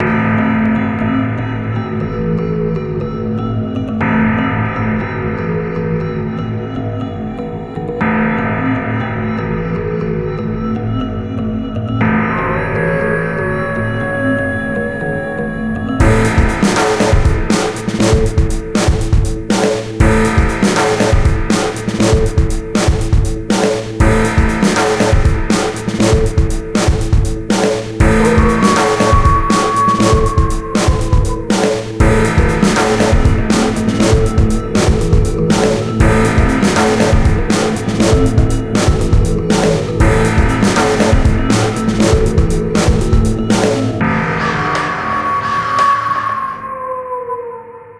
The world changes when the sun goes down, the bell tolls and signals the witching hour has begun. What happens in your world when the clock strikes midnight?
Just another random, enjoy. I'm putting this in a pack called Random Music Shorts, which I'll add more to soon.
electro, raven, synth, techno